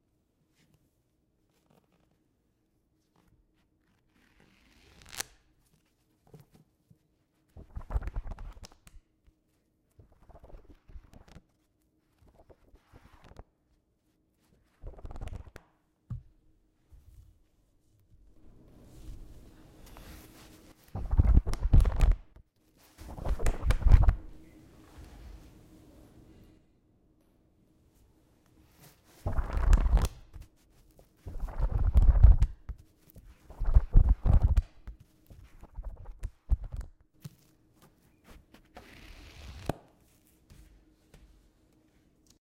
Flipping Pages
book, Flipping, a, pages